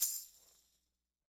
Crub Dub (Tambourine 2)
Dub, HiM, Roots